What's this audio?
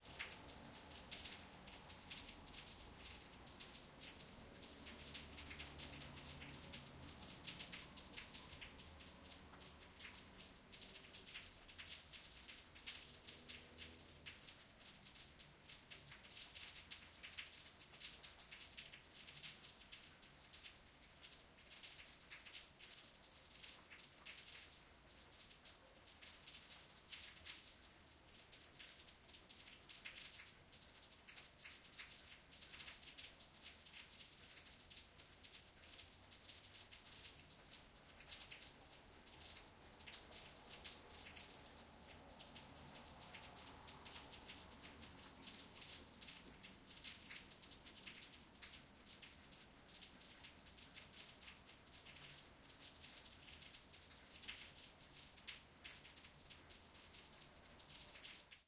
rainspout drip, post-heavy rain, reverberant space, h2n, ambix
Rain spout drip after heavy rain, recorded with the Zoom H2n in Spatial mode in a very reverberant space. This is an ambisonic recording - b-format, ambix. Do to a limitation of the H2n, it does not have a height channel. You can decode this file to mono, stereo, or surround with Rode's sound field plug-in.
b-format, ambix, ambisonic, rain, rainspout